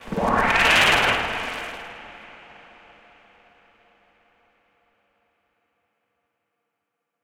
explosion beep kick game gamesound click levelUp adventure bleep sfx application startup clicks
beep, clicks, kick, click, gamesound, bleep, game, explosion, levelUp, startup, adventure, sfx, application